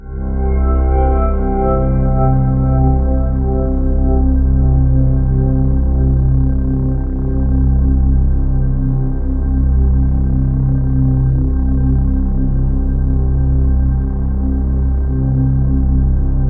Custom pad I created using TAL Sampler.
ambient, atmosphere, C1, electronic, loop, pad, sample, single-note, synth, synthesizer